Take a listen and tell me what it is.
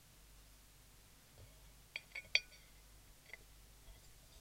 rotation2Fr
Coins from some countries spin on a plate. Interesting to see the differences.
This one was a 2 French Fr
coins, rotation, spinning